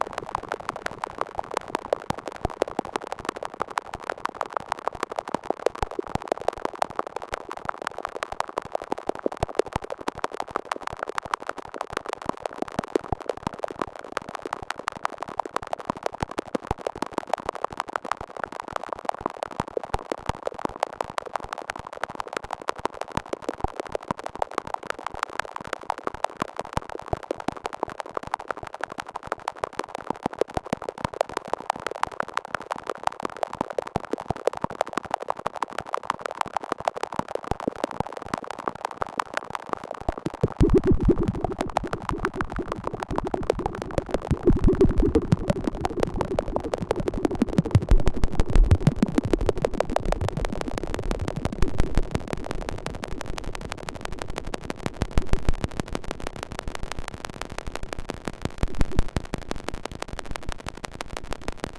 synthetic, cricket-like sounds/atmo made with my reaktor-ensemble "RmCricket"
noise, cricket, atmosphere, synthetic, clicks, glitch